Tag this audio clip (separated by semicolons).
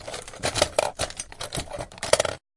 writing; pen; desk